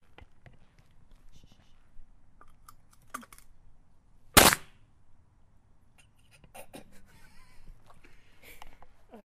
me stopming on an empty can